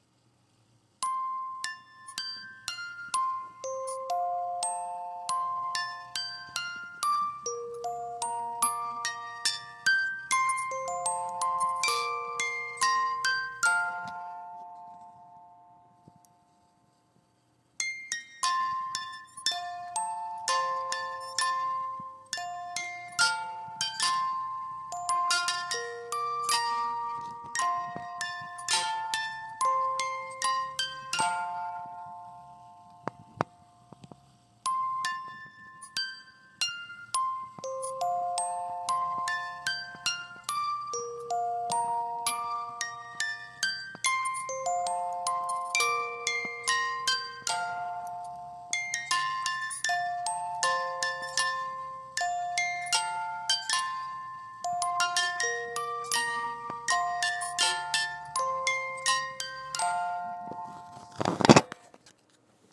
glockenspiel (made by "Sankyo, Japan") playing "Jingle bells")
jingle-bells, glockenspiel